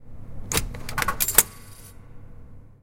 Return Coin Drink Vending
Sound of mechanism of return of coins. It sounds metalic, first the sound of return lever and finally a clear crash of money in the deposit space.